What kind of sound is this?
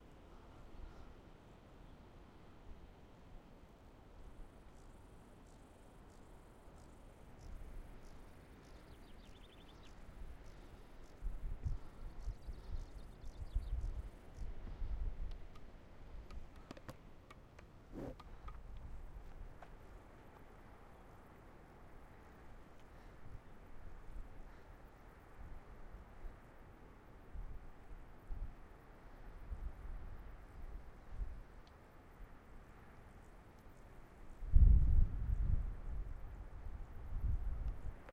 Grasshoppers Alps
Recorded in the French Alps on Zoom H2. Features Grasshoppers and birds.